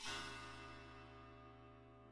China cymbal scraped.
sample, china-cymbal, scrape, scraped